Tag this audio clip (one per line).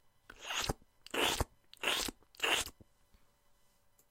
sound
lick
hand
slurping